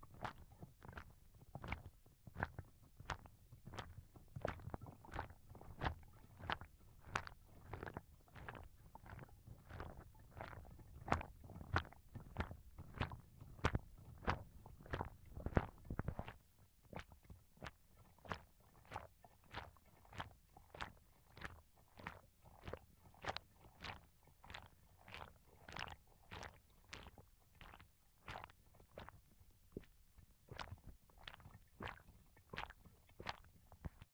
carefully shaking hollowed out pumpkin, filled with water; recorded with a Zoom H2 to Mac/HD
halloween, pumpkin, processed, recording